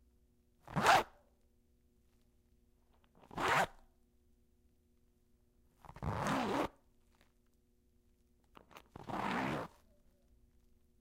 short zipper, fast and slow